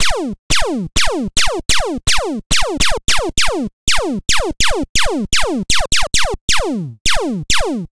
Here a sound of a laser gun!
I made this with my Acces Virus!
And some EQing and layering!